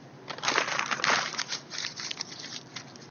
Slightly old audio which I recorded for a scrapped game. Nothing much to say here. Just crumpled some paper obviously